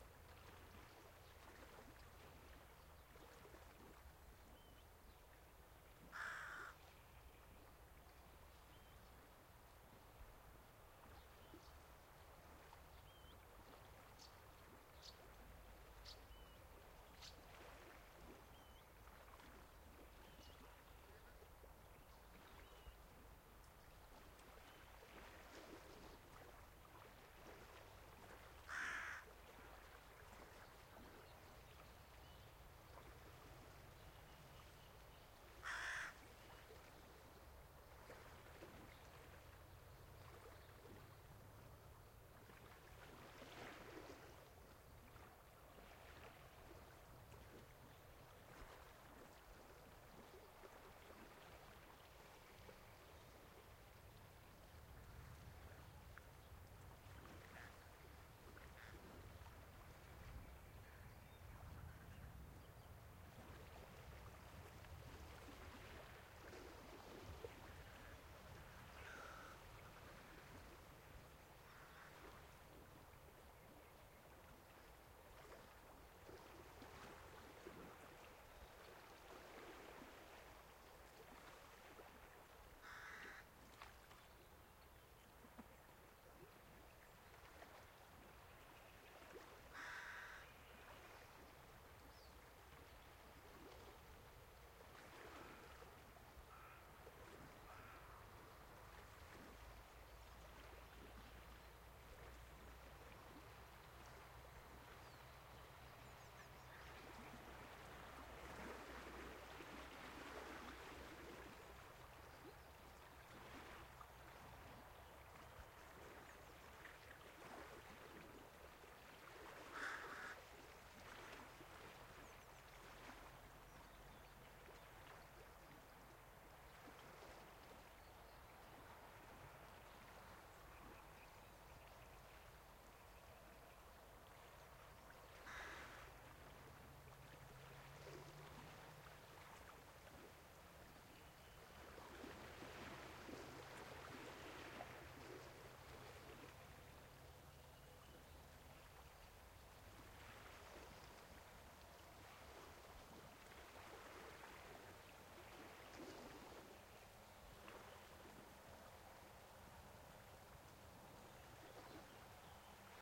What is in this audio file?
Lagoon ambience in summer, daytime. Distant waves, birds, crows, insects, frogs.
Stereo, MS.
Recorded with Sound devices 552, Sennheiser MKH418.
water, nature, frogs, crows, birds, field-recording, waves, summer, lake, lithuania, lagoon, day, seaside